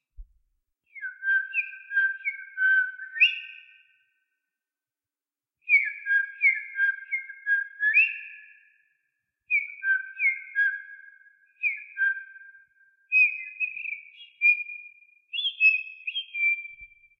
I recorded my whistle. I have the ability to make reverse whistling sounds. I just killed background noises in my bedroom using my audio editing program and I added a reverb for that echo in the forest sound.